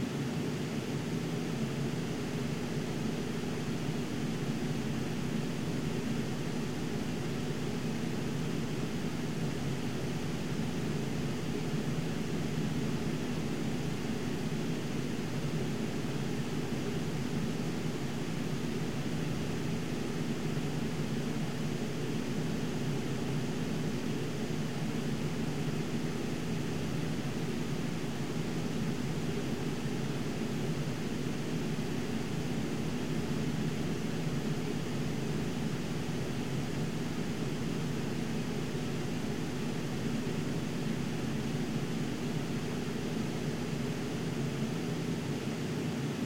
My room, and its noisy fan
my big fan blowing it's air through my messy room and making a lot of noise, i guess!
teenager, fan, ambience, white-noise, air-conditioning, noise, room, big-fan